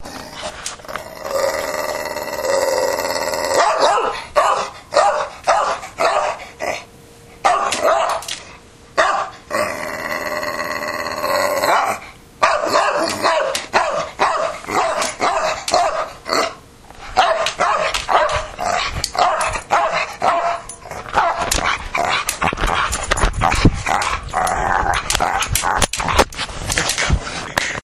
Pooh Bear attacks me for a treat
My dog Pooh Bear wanted a dog treat so bad, she "attacked" my recorder. What a lardass she is. LOL
monster, dog, guttoral, snarl, growl